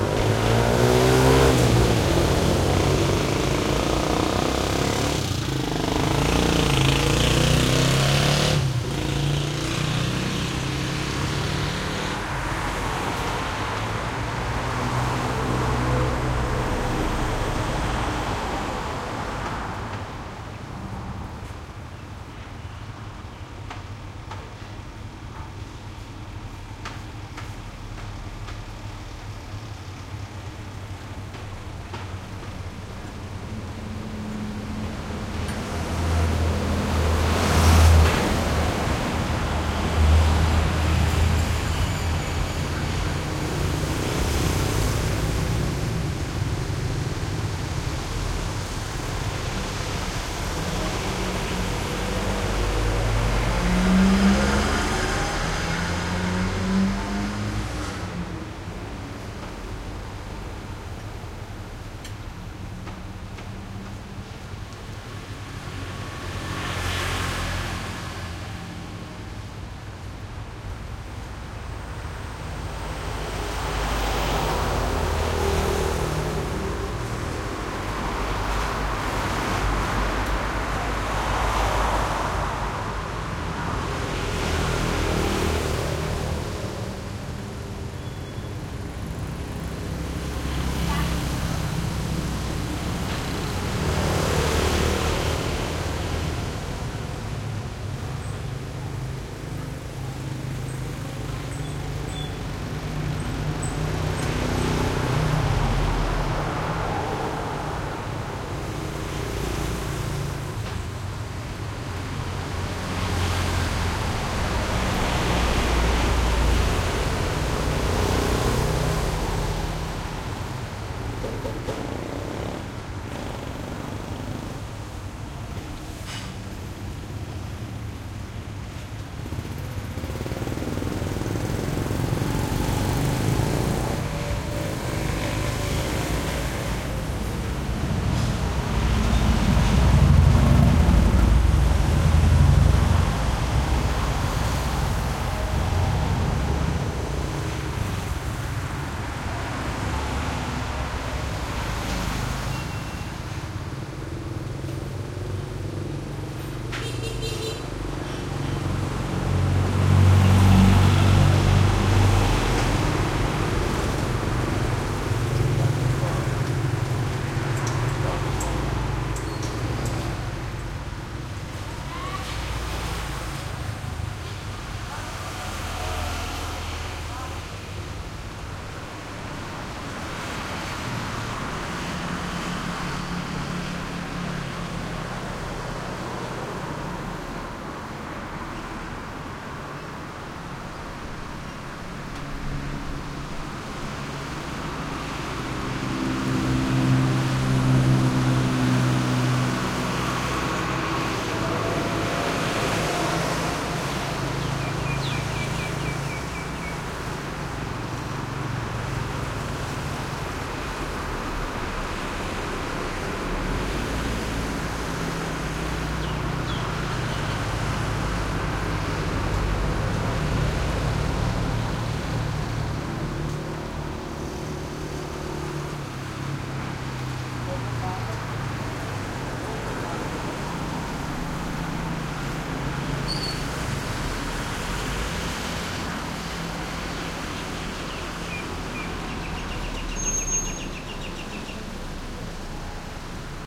Thailand traffic medium busy cu town street